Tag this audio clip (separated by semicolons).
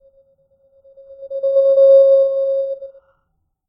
feedback; noise; squeal; harsh; oscillating; microphone